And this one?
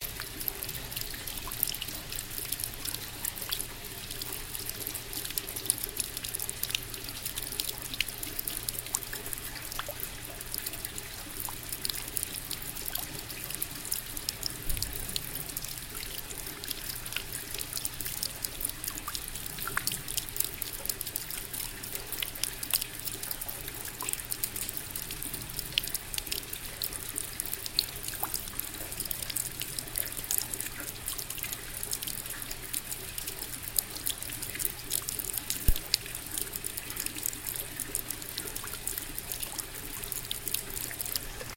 small water fall during a rain at Frank Lloyd Wright's FallingWater.